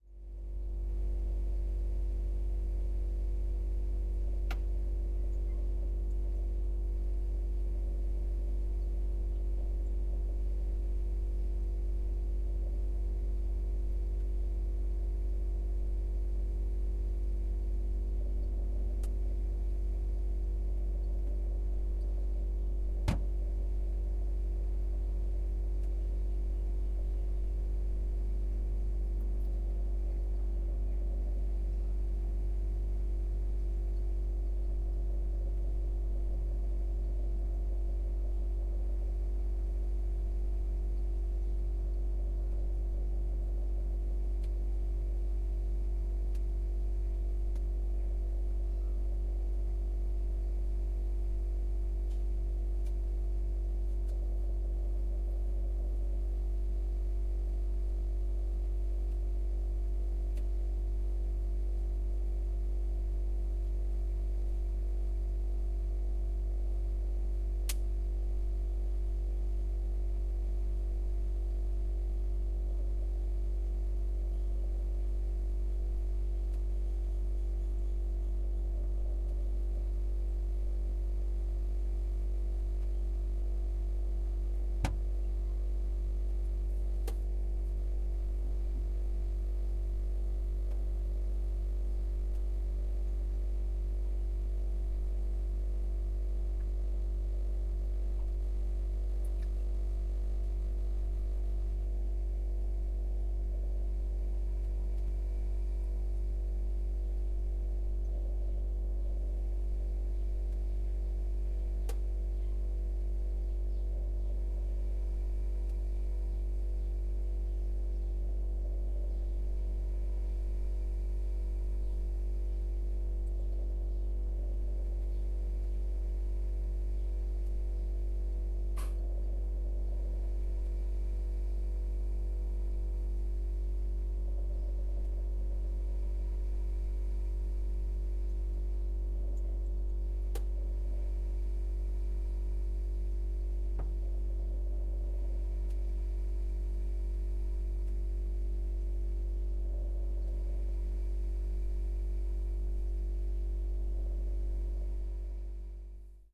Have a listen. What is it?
Machine-Drone2
Drone of a household appliance. (New Zealand)
Ambience, Appliance, Drone, Hum, Industrial, Machine, Machinery, Noise